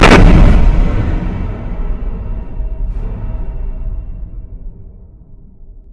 My fourth forgotten explosion I made. Forgotten mostly because it sounded weak.